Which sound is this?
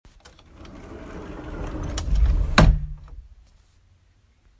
Sliding door
Sliding, normal, door